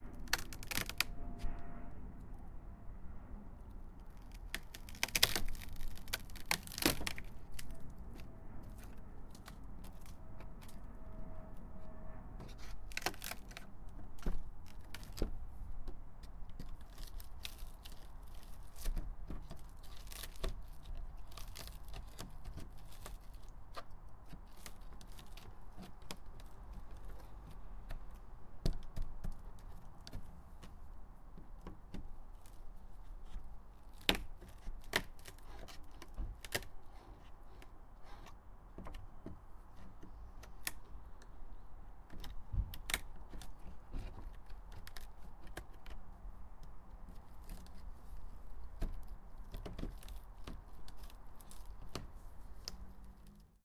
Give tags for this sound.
breaking
cracking
creaking
destroying
rotten
rotten-wood
snapping
squeaking
tearing
wood

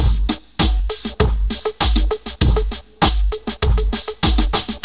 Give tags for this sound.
beat
loop
messy
rhythmic

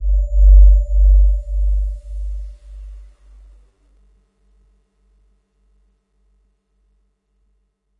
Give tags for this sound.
bass
synthetic
multi-sampled
space

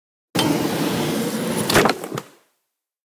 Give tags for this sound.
actions
drawer
fast
foley
open
opening
short
sound
sound-design
sounddesign

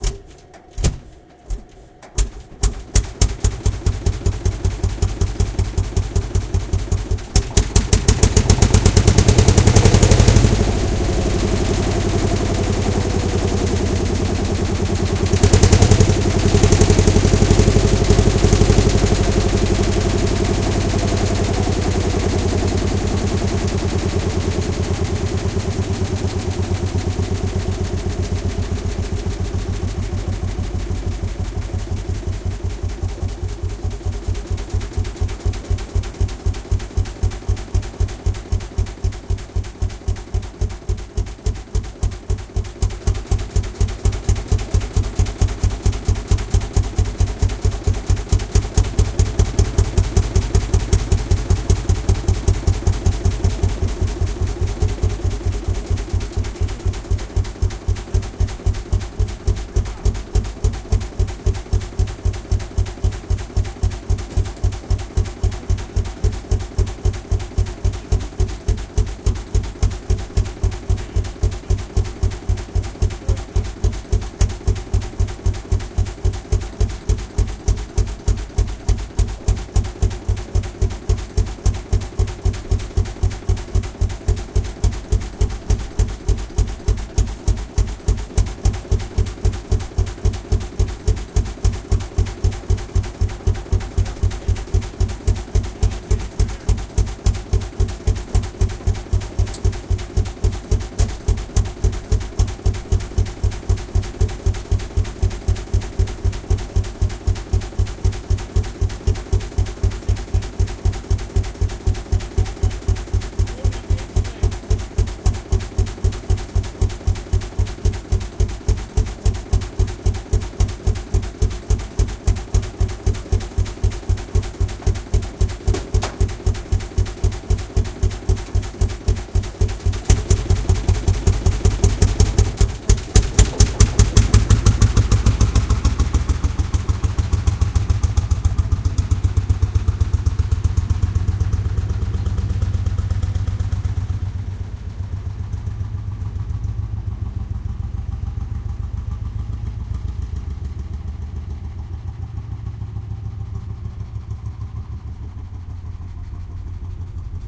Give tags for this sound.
chitty
acceleration
encendido
outside

